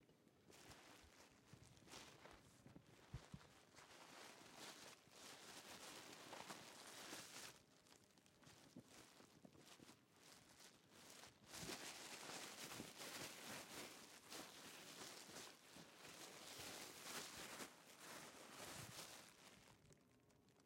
Boom Folie LookingThroughThings
Searching in a bag.